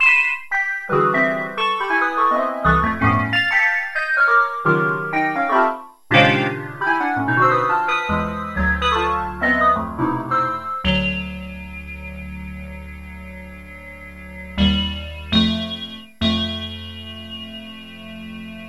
geplänkel mithauptteil1
a freehanded introplay on the yamaha an1-x. harmonies at the end.
played, freehand, syntheline, yamaha, an1-x